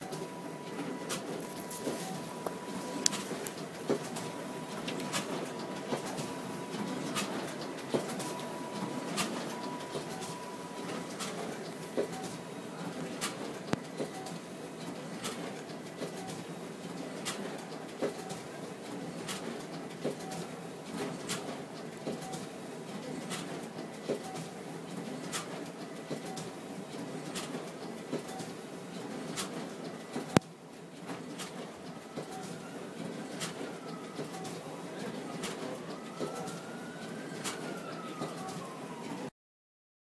a copy machine at work in a quiet office, with a little bit of ambulance sound near the end in the background.